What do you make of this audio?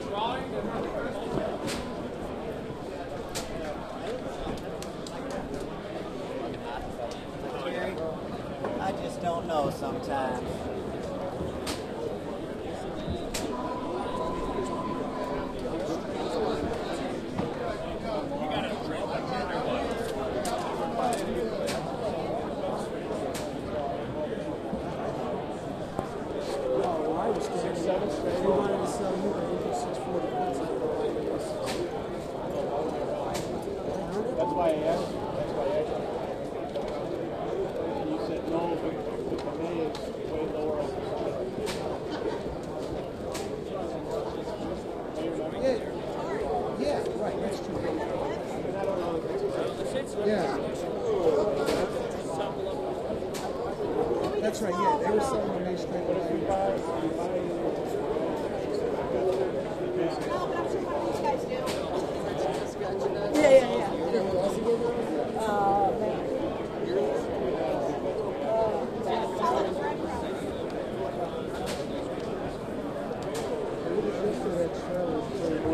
Floor trading1
Ambient sounds on the trading floor before the opening bell, walking on the trading floor, groups of people talking in the background, friendly chatter,
calls, crash, cry, derivatives, exchange, floor, floor-trader, floor-trading, futures, hedge, money, open, open-outcry, options, out, pit, Pit-trading, puts, stock-market, stocks, trader